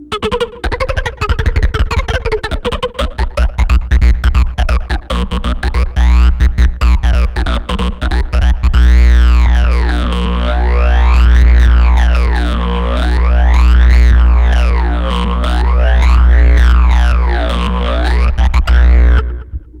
Recorded with a guitar cable, a zoom bass processor and various surfaces and magnetic fields in my apartment. Scraping metal surfaces....
radiation, scrape